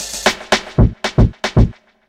Roots onedrop Jungle Reggae Rasta